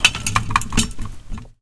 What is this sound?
Metal Glass Objects Rattling 2
Shaking something small, metallic and plastic. Recorded in stereo with Zoom H4 and Rode NT4.
iron, metal, metallic, rattling, shaking